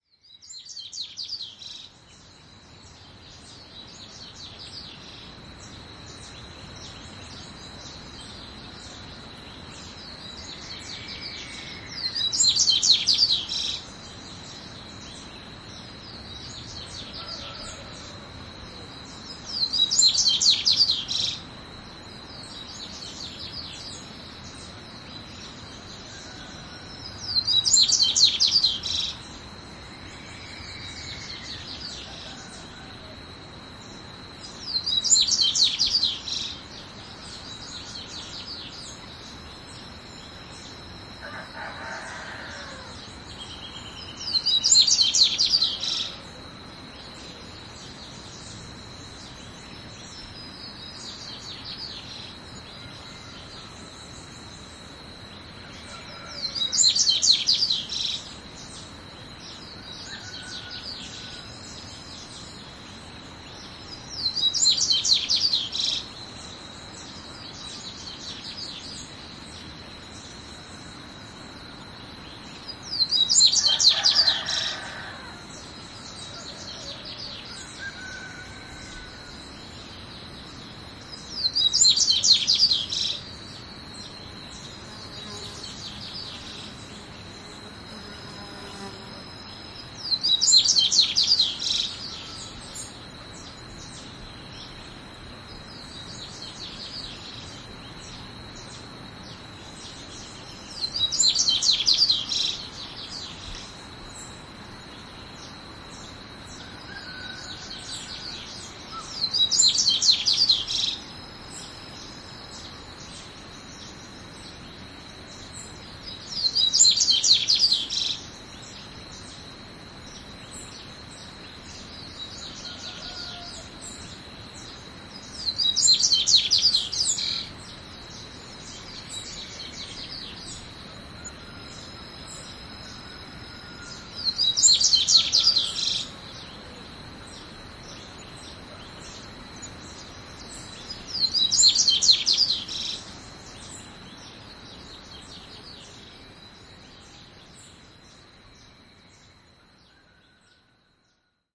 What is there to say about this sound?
Birds on early (sunny) morning in the interior of Minas Gerais, Brazil.
belo-horizonte, bird, birds, brasil, brazil, cachoeiras, countryside, early-morning, field-recording, forest, minas-gerais, morning, nature, rio-acima, rural, tangara